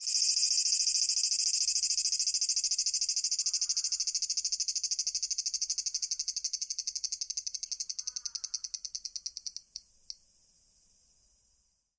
A single cicada lands on the window screen in Nagoya, Japan, 24.07.2013. Recorded with a Sony PCM-M10 placed at 10 cm of the cicada, you can hear all of cicada's 'singing' process.
Close-up, Insect, Summer